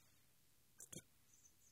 A burp. Recorded with a Tascam DR-05 and a Rode NTG2 Shotgun microphone in the fields of Derbyshire, England.